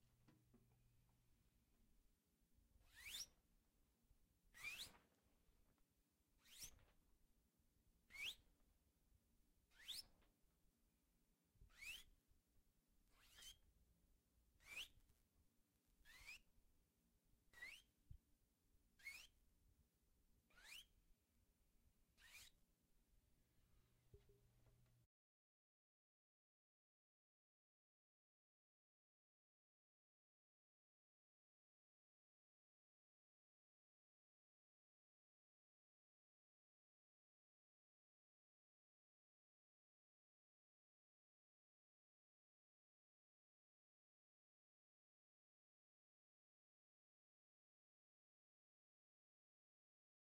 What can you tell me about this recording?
untitled curtain
sound from curtain